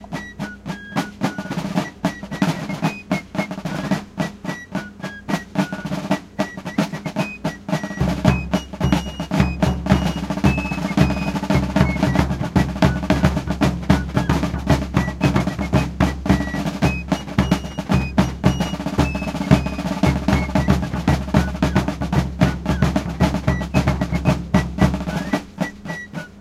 civil war music
music from a civil war reenactment
civil, drums, flute, music, war